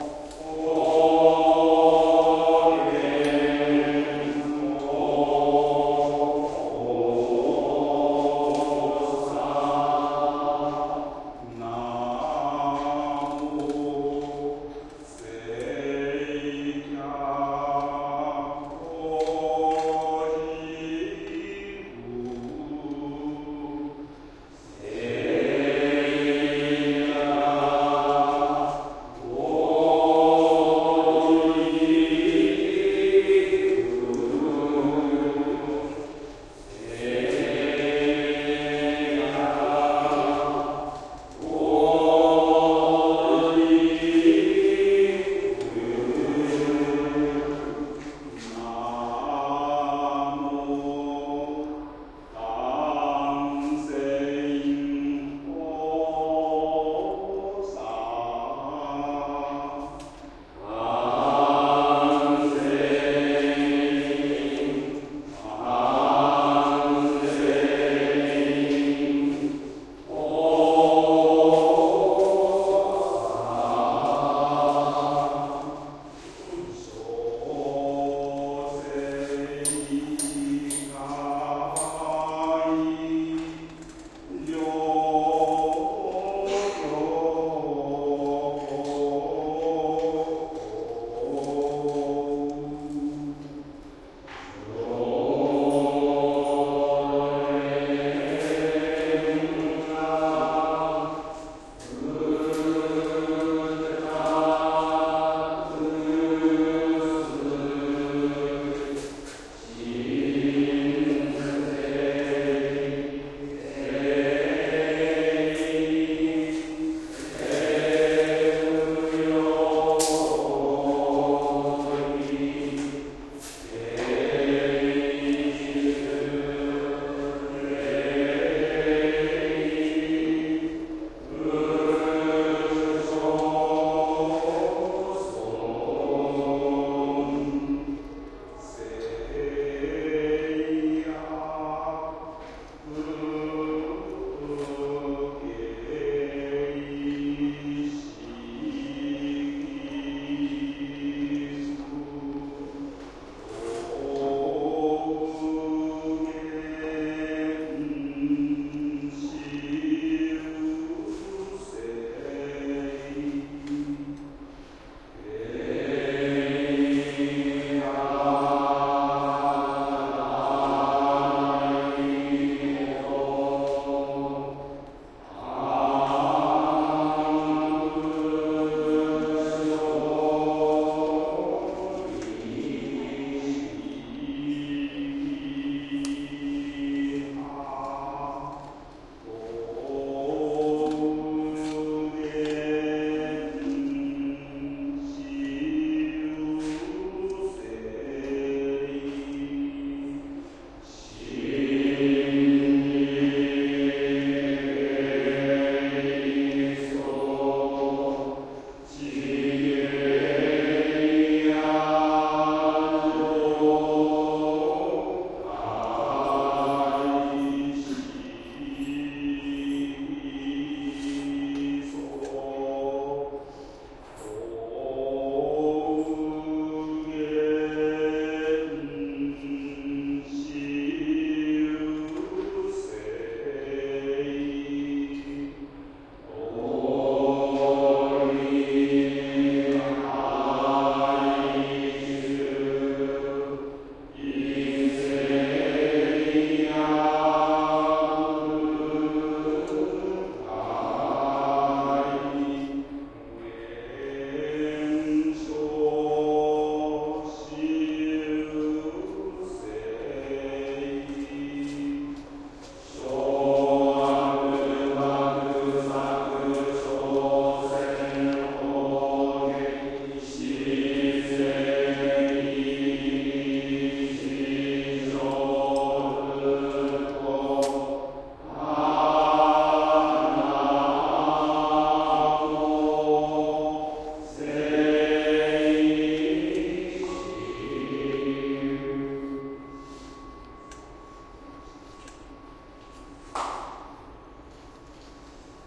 Recorded in Osaka Shitennoji Temple, 19.07.2013. Responsory prayer buddhist singing, punctuated by light strokes on a tibetan singing bol. Recorded with internal mics of Sony PCM-M10.